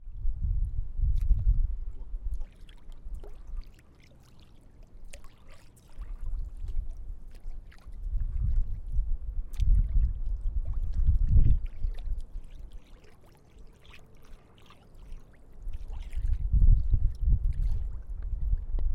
Agua Viento
Sound generated by the movement of water and wind